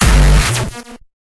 xKicks - Here'sJohny
Im sorry I haven’t been uploading lately… I really can’t give a reason as to why i haven’t been uploading any of the teaser kicks lately, nor have i given any download links for the actual xKicks volumes 1 or 2…
Ive actually finished xKicks volumes 1 - 6, each containing at least 250 unique Distorted, Hardstyle, Gabber, Obscure, Noisy, Nasty kicks, and I’m about to finish xKicks 7 real soon here.
Here are various teasers from xKicks 1 - 6
Do you enjoy hearing incredible hard dance kicks? Introducing the latest instalment of the xKicks Series! xKicks Edition 2 brings you 250 new, unique hard dance kicks that will keep you wanting more. Tweak them out with EQs, add effects to them, trim them to your liking, share your tweaked xKicks sounds.
xKicks is back with an all-new package featuring 250 Brand new, Unique Hard Dance kicks. xKicks Edition 3 features kicks suitable for Gabber, Hardstyle, Jumpstyle and any other harsh, raw sound.
Add EQ, Trim them, Add Effects, Change their Pitch.